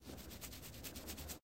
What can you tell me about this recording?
90-pelo el
Someone scratching his hair
head, hair, man